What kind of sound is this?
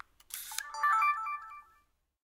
FX Camcorder Sony TG3 Switch on
Electronic beep and shutter sounds from videocamera